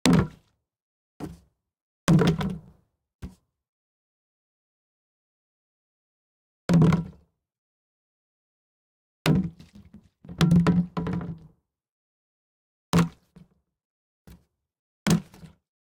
plastic gas container put down on pavement empty
gas, put, empty, plastic, down, container, pavement